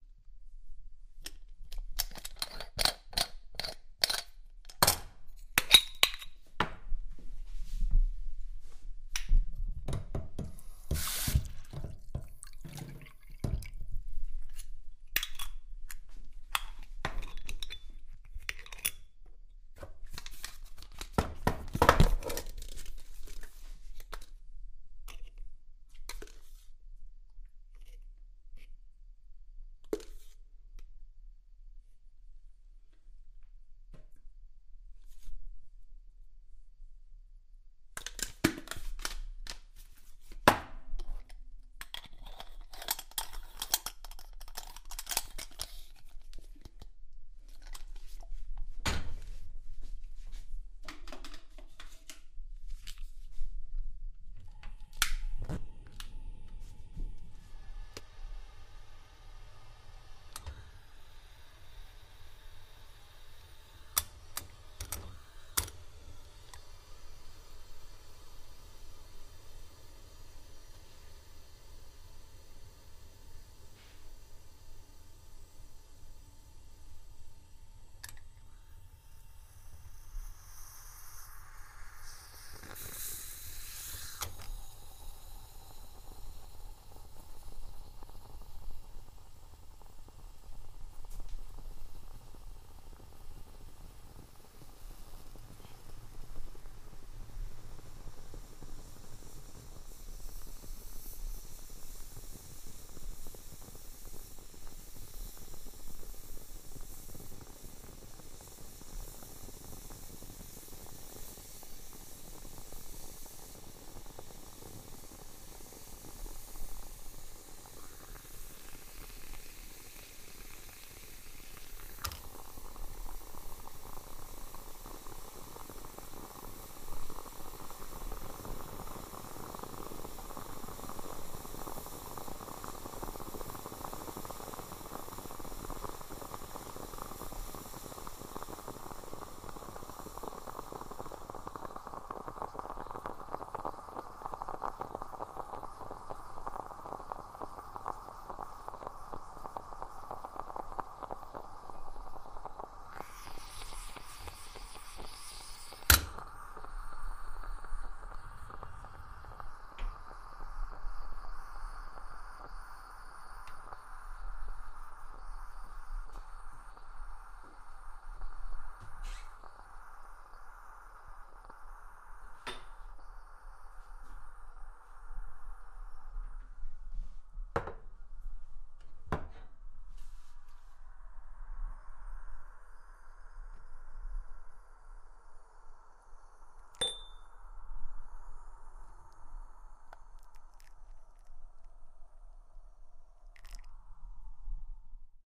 Coffee Moka
coffee-machine, home, machine, nespresso